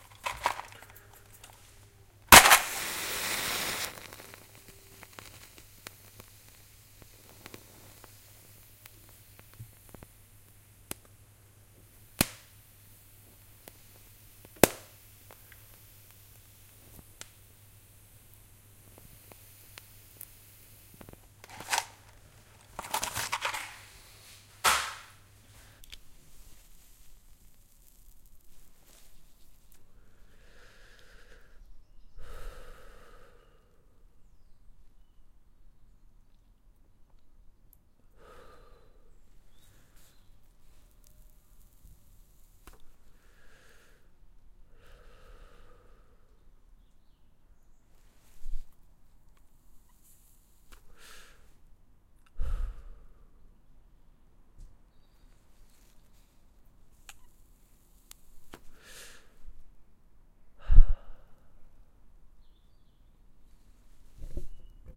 Match smoking
Striking a match, lighting a cigarette and smoking it.